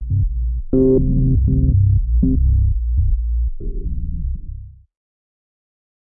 Broken Transmission Pads: C2 note, random gabbled modulated sounds using Absynth 5. Sampled into Ableton with a bit of effects, compression using PSP Compressor2 and PSP Warmer. Vocals sounds to try to make it sound like a garbled transmission or something alien. Crazy sounds is what I do.
ambient, artificial, atmosphere, cinematic, dark, drone, electronic, evolving, experimental, glitch, granular, horror, industrial, loop, pack, pads, samples, soundscape, space, synth, texture, vocal